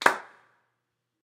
Guillotine blade opening and closing. 10 cm away from micro.